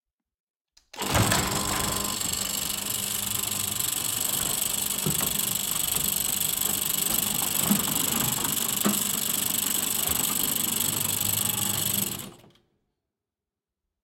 Electronic Gate Open 01
motor, machine, gate, engine